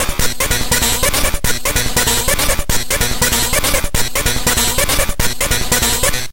Yea A Roland 505 ......
Good Intro Beats or Pitch Them Down.... Whatever....
musik, circuit-bent, drums, hop